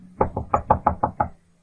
A sound I made by knocking on a wooden table.